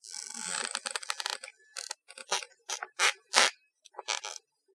Some squeaks from the floor when I bounce up and down slightly.Recorded with a Rode NTG-2 mic via Canon DV camera, edited in Cool Edit Pro.
creak, hardwood-floor, footstep, walking, hardwood, squeaky, squeak, creaky, floor